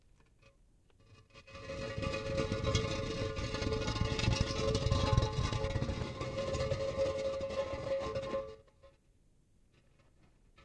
Dragging different kinds of stones, concrete blocks, on a concrete ground, looking for the sound of an opening grave, for a creepy show. Recorded with a zoom H2.
ambience, creepy, soundeffect, stone